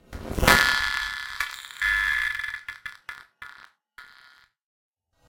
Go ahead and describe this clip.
Attacks and Decays - Single Hit 4
Very similar to "Single Hit 3" with a brighter high-end. Band-pass like timbre with very short attack followed by long processed decay tail. Very slow AM (sub-audio frequency) applied throughout.
synthetic, spectral, experimental, electronic, hit, sfx